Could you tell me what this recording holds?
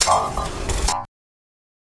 Sci-fi door open
tech, open